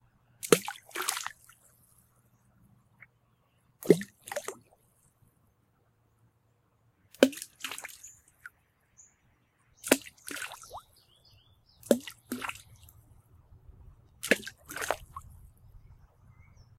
Rocks into water at Spfd Lake
A series of rocks being thrown into a pool of water